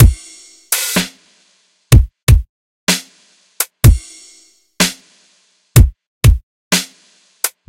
beat with snare 4 4 125bpm blobby type kick fizzy hats 3456-3466
beat with snare 4 4 125bpm blobby type kick fizzy hats
quantized, groovy, loop, beat, percs, drum, dance, drum-loop